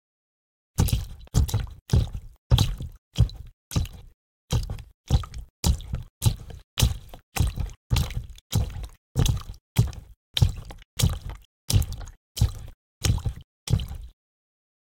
Footstep Water
Footsteps recorded in a school studio for a class project.
feet, foot, footstep, footsteps, step, steps, walk, walking